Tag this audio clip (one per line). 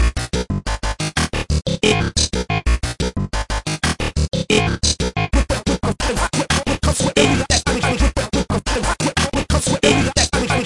techno
sliced
industrial
loop
hardtek